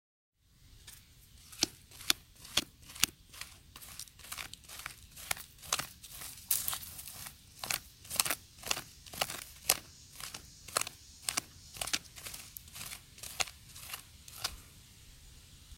The sound of someone/something digging in the ground, at intervals hitting a rock every now and then.